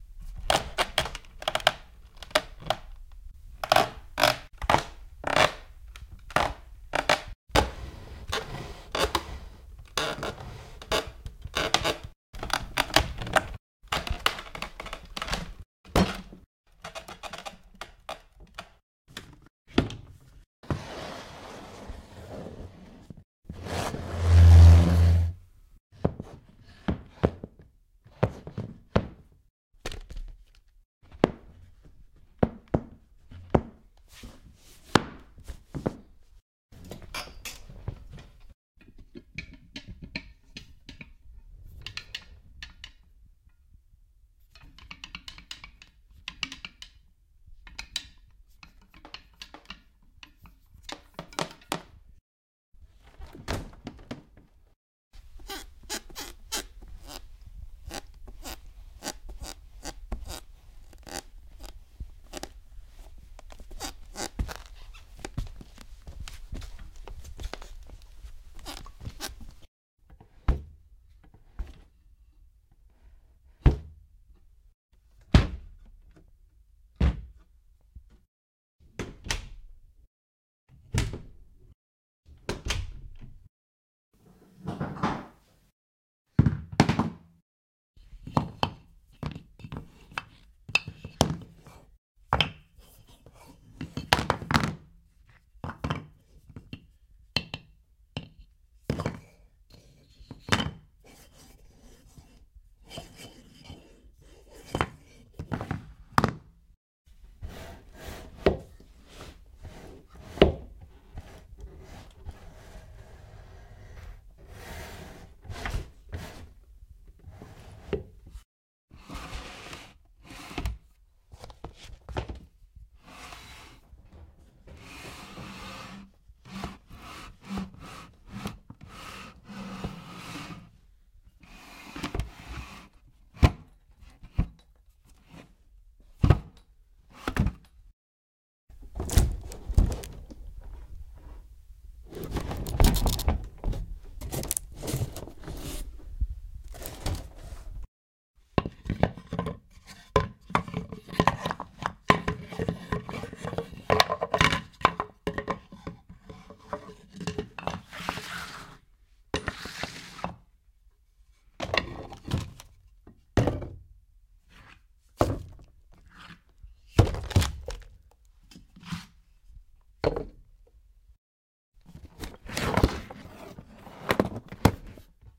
handling furniture
Furniture being handled in apartment. Recorded with mixpre6 and Sennheiser mkh416p48.